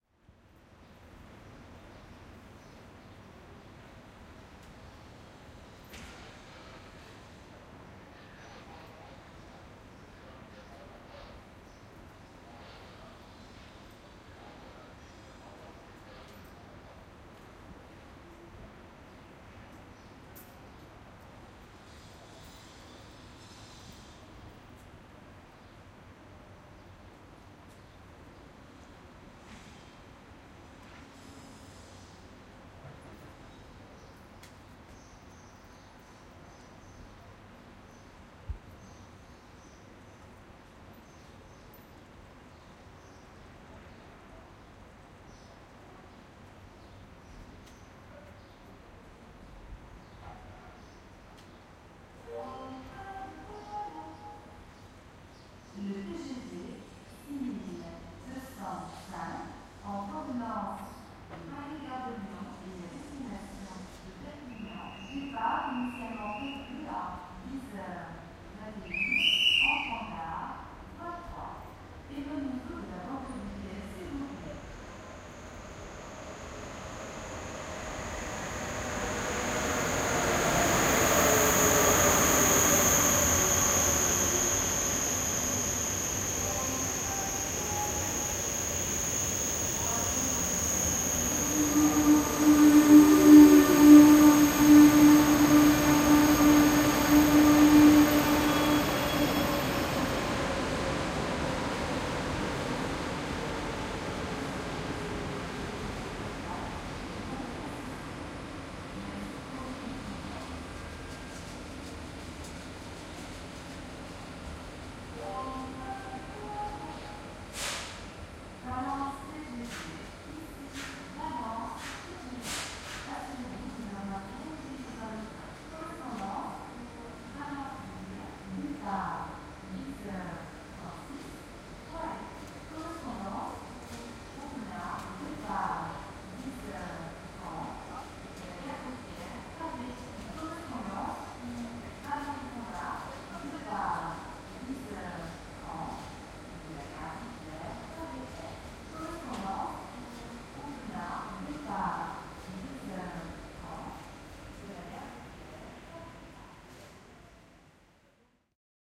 Train arrival
Another ambience from the outside of the train station, with also multipal ans typical sounds. plus the arriving of a train